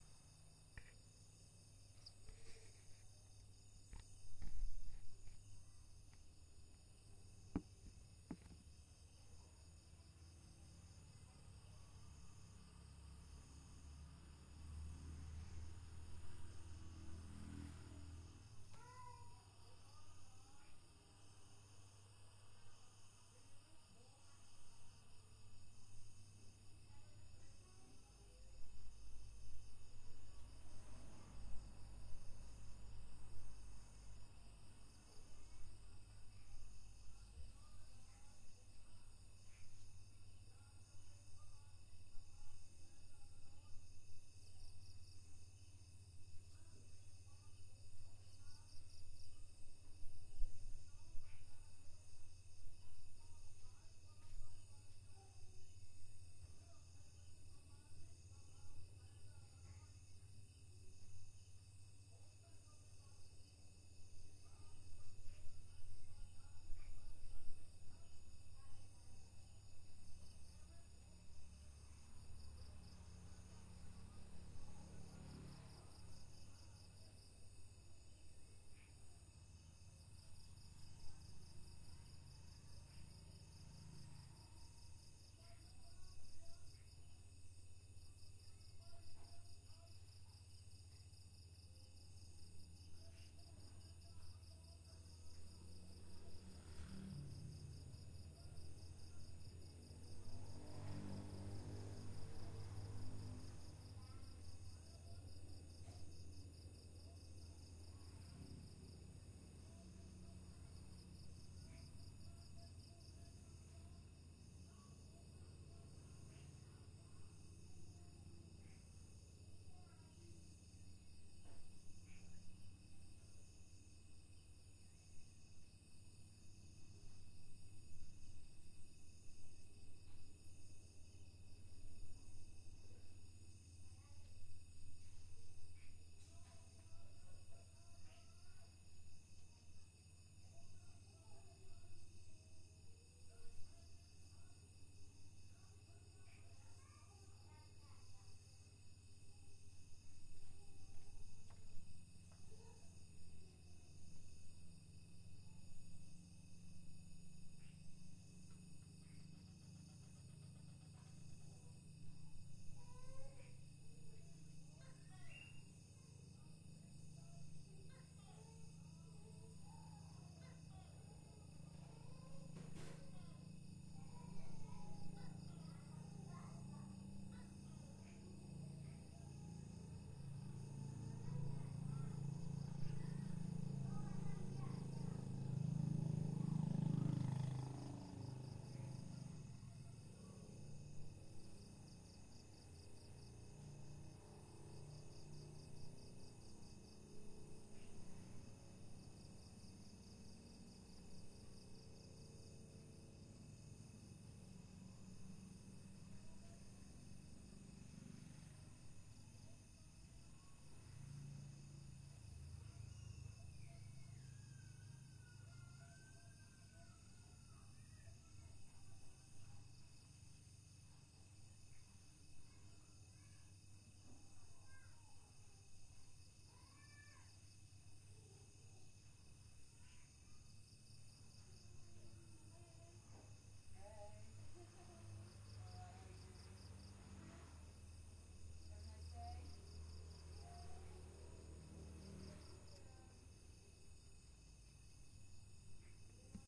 Night nature at Klong Nin, Koh Lanta, Thailand
Night sounds, in a small village (Klong Hin) on the island of Lanta (Koh Lanta), with crickets, gecko, thai neighbors and friends speaking english.
Recorded the 11/11/2013, at 6:10 pm.
television, gecko, cricket, night, neighbours, expats, island